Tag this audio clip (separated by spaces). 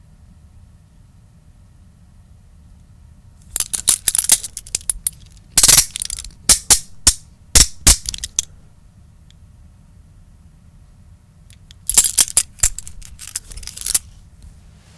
closed handcuff